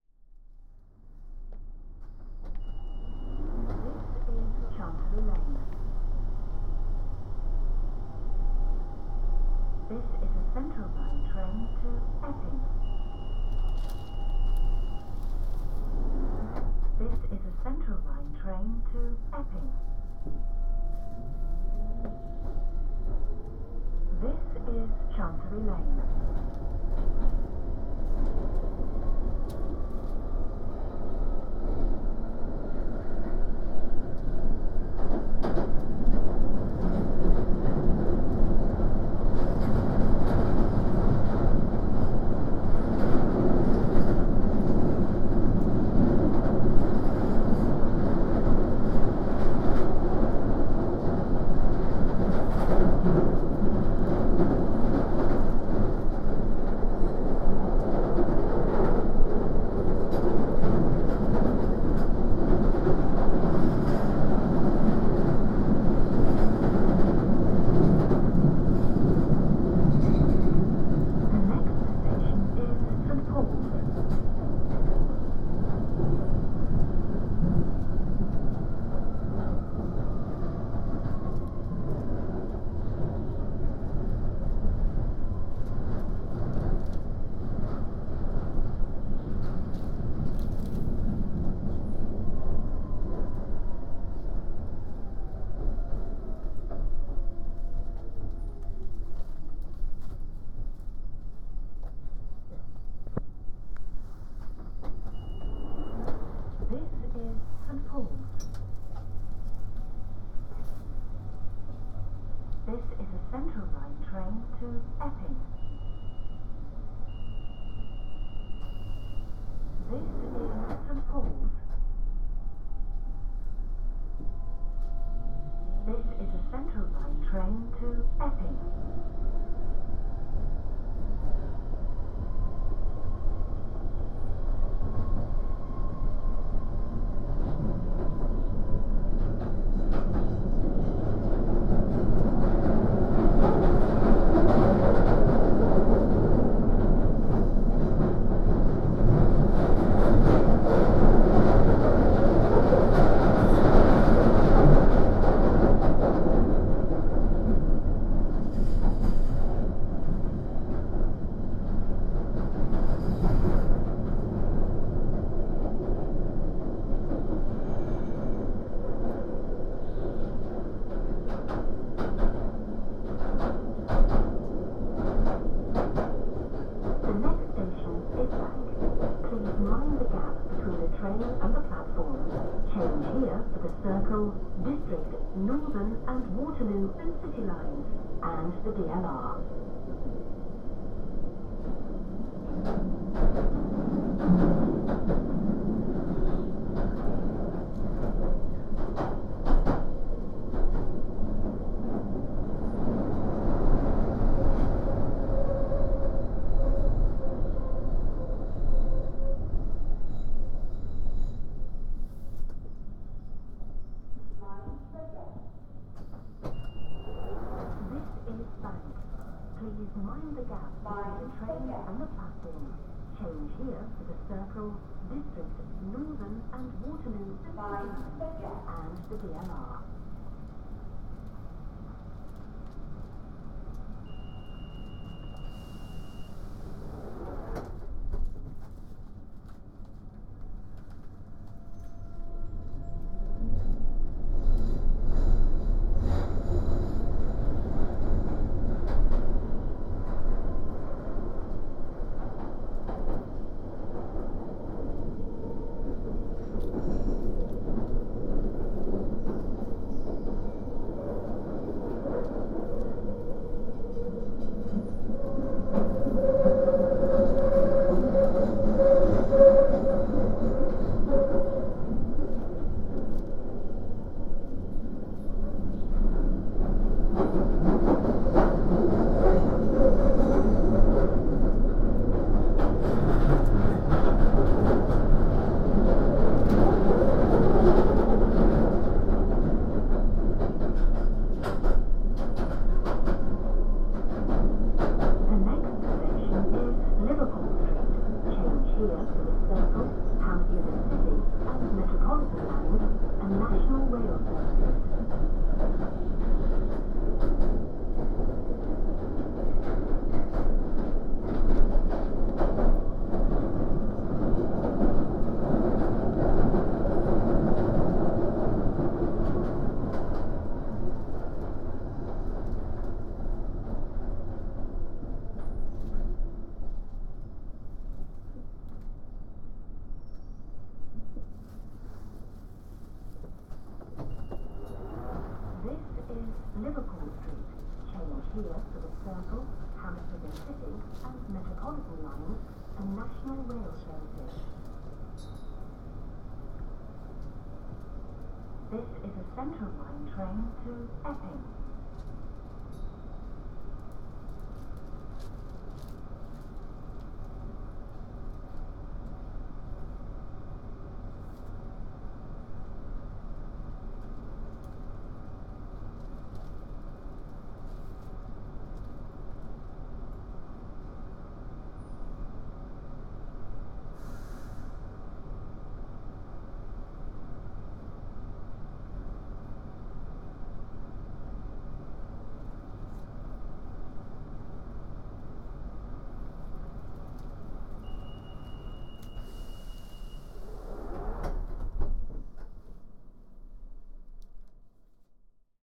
London Underground Trains arriving, moving, announcement, stops 2013
field-recording, tube, underground, urban, ambience, london, ambiance, city, train